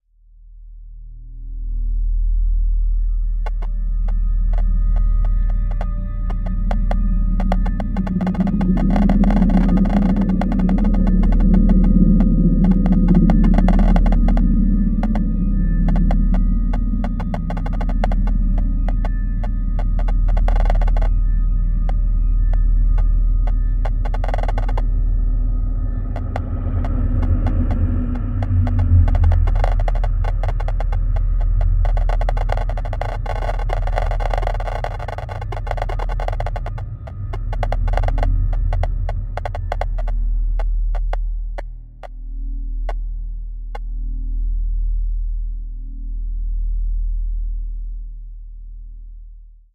Creative Sounddesigns and Soundscapes made of my own Samples.
Sounds were manipulated and combined in very different ways.
Enjoy :)
Ambient, Atmosphere, Counter, Creepy, Dark, Deep, Drone, Geiger, Geigercounter, Noise, Nuclear, Radioactive, Scary, Sci-Fi, Sound-Effect, Soundscape